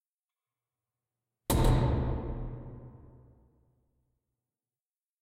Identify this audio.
S Spotlight On
large spotlight turning off